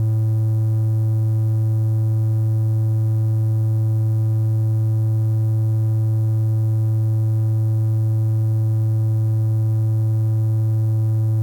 Doepfer A-110-1 VCO Triangle - A2
Sample of the Doepfer A-110-1 triangle output.
Captured using a RME Babyface and Cubase.